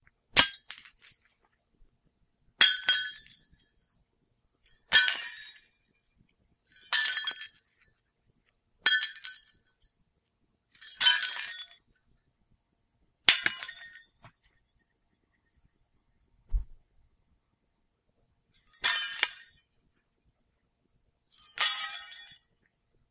Light Bulb Tinklings & Breakings
Just glass shards falling on the floor. Great for a backround noise in those 'room is collapsing' scenes.
tinkle, glass, break, bulb, light, smash, crash